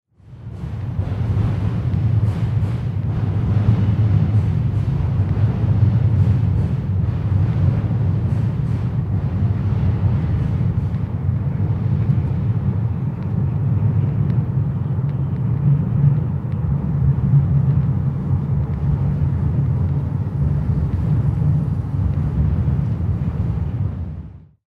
Train Bridge
This is a sound recorded during July, 2011 in Portland Oregon.
pdx, bridge, soundscape, train, trains, sound, sounds, city, oregon, portland